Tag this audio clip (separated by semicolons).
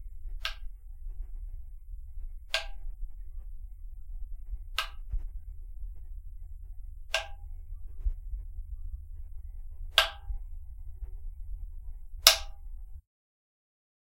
electric; hand; harsh; switch